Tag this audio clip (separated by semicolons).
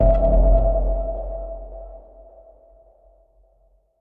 audio; Dub; electronic; electronica; live; music; one; production; sample; samples; shot; stab; stabs; synth